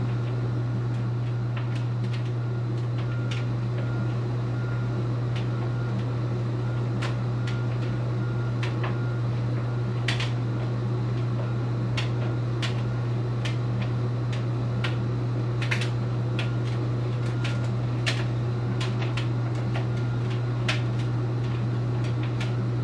Ambient loop of a machine producing background noise as it's running. Captured by recording a tumble dryer running.
Factory, Machine, Industrial, Machinery, Mechanical